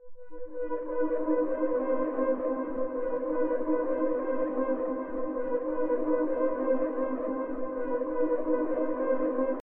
an high moving back an forth wide sound.made in ableton